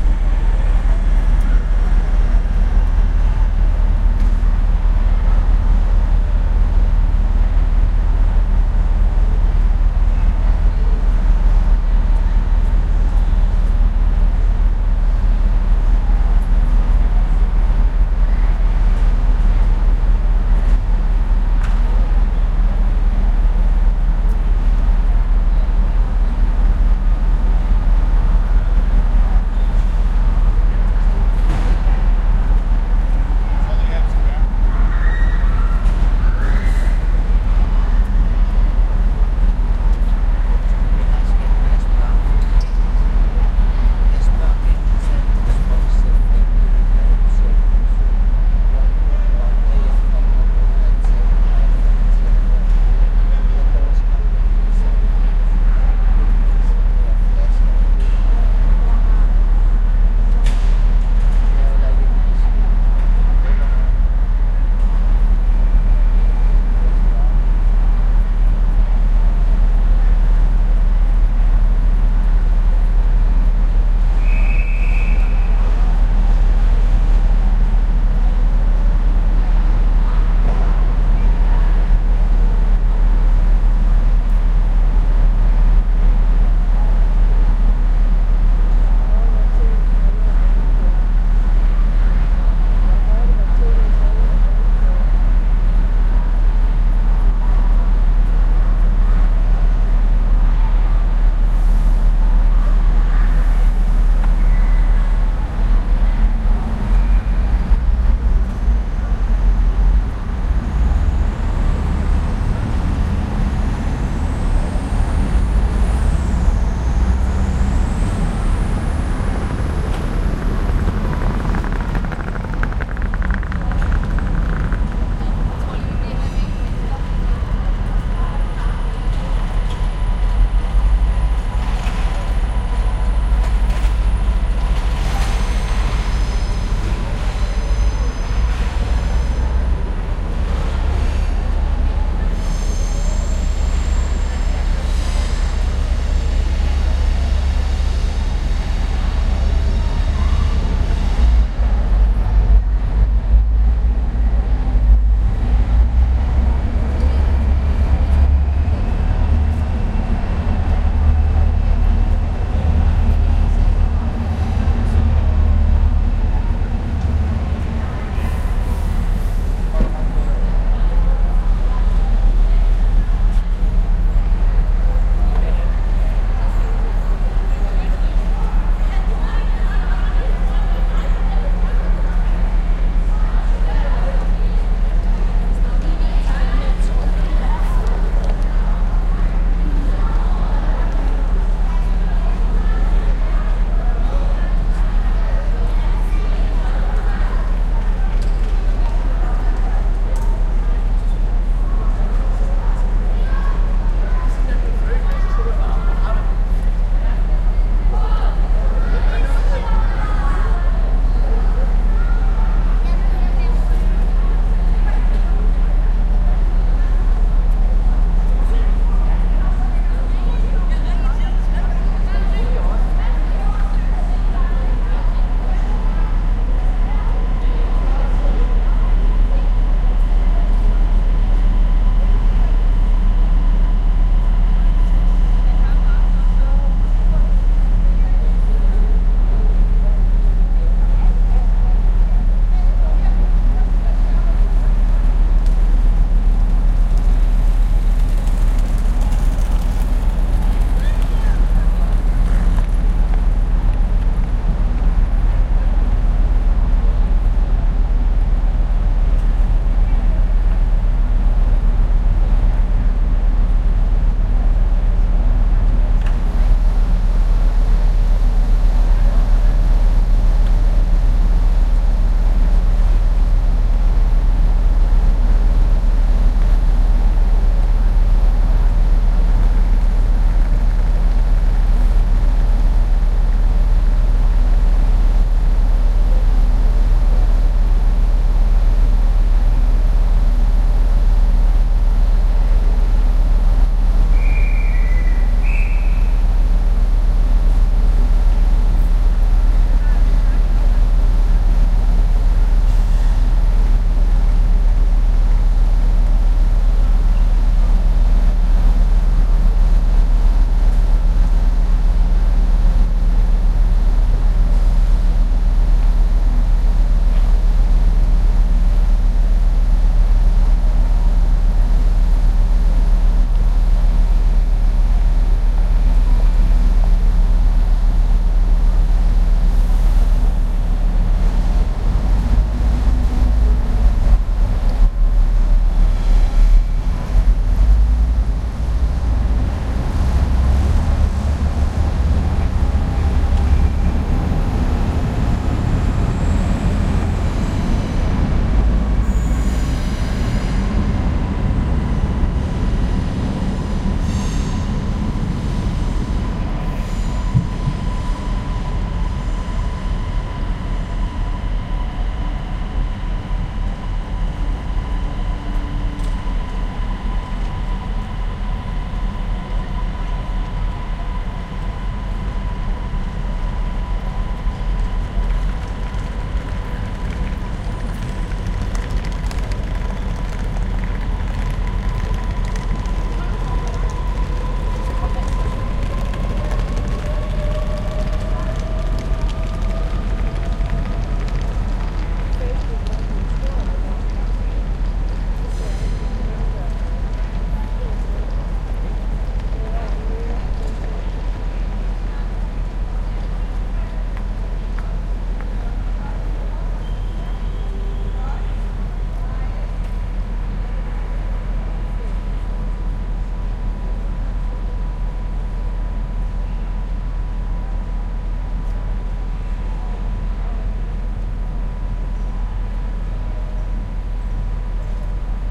copenhagen central trainstation about to board
Recording from the train station, with a couple of departures. Sony HI-MD walkman MZ-NH1 minidisc recorder and two Shure WL183
ambient, central, chat, chatter, copenhagen, cph, departure, dragging, dsb, dsb-sound, field-recording, fieldrecording, footsteps, human, humans, luggage, pedestrian, pedestrians, people, station, steps, suitcase, talk, traffic, train, trains, travelers, trolley, walk, walking